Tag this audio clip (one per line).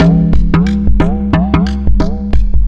electronic
percussion
reason
redrum
wavedrum